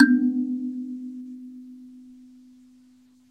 pinger 3 coconut piano
bing, blue, blush, boom, dare, death, move, now, piano, tong